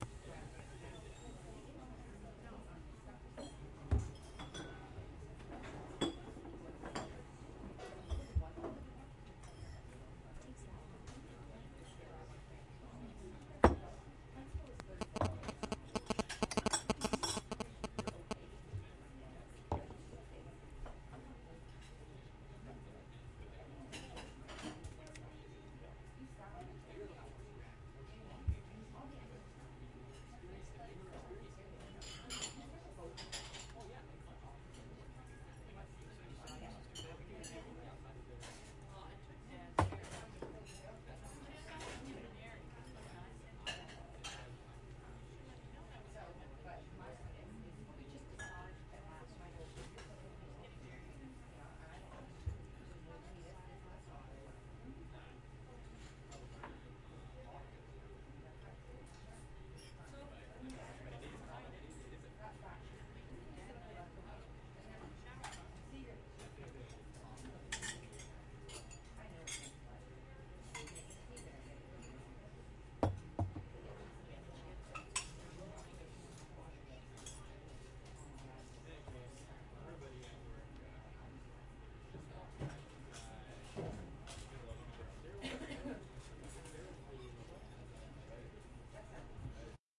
a recording i did inside a restaurant in the hamilton area. used my trusty zoom h4, brought into live cut off some low end. 16, 441.